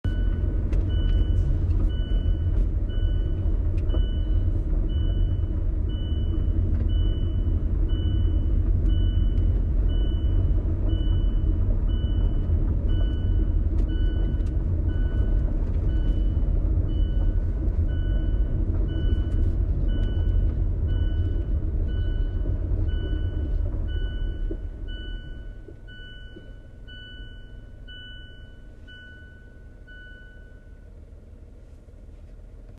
Seatbelt Light
A recording of the seat belt indicator going off while driving slowly.
car, indicator, seatbelt, recording, light